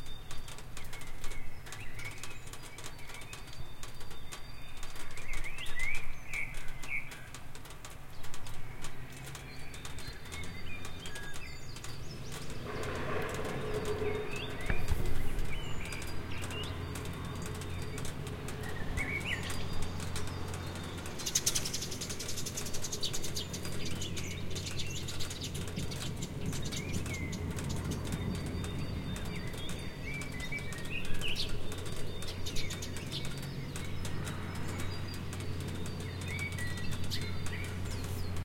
after rain bird traffic
Water is driping from the roof after a spring shower in residential area
Recorded with Zoom H4n through Rode stereo videomic pro.